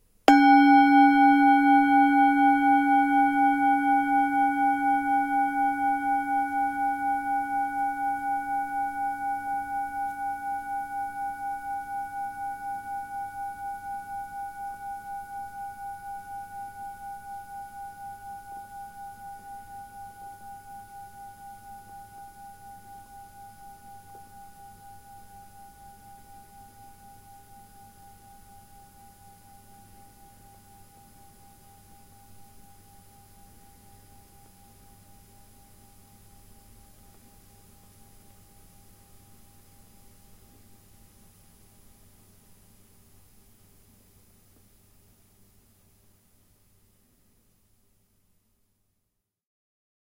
Singing Bowl 1 (big)/ Klangschale 1
Just a simple, clear singing bowl :)
metallic, percussion